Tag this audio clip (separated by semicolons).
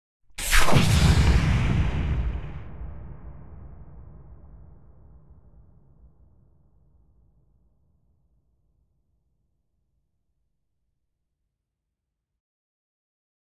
Lightening; Rain; Crack; Thunder; Torrent; Electrical; Sky; Bolt